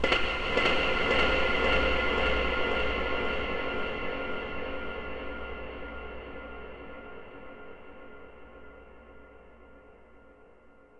Horror CookieMonster count2
A whole ton of reverb and delay on some found-sound recordings.